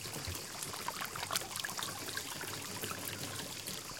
Fountain & Cicadas
Recording of a mountain spring in Provence with a lot of cicadas in the background